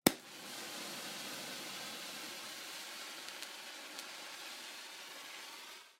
Road flare - lighting1
This is the sound of lighting a road flare. The strike really is just a quick snap before it bursts into flame. It was recorded with a shotgun mic from a couple feet away. I may try recording one of these again in a quieter place other than my neighborhood.
flare, strike, flame, ignite